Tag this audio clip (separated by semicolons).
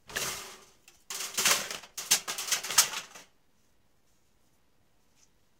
crash; can; fall; 252basics; steel; bang; collapse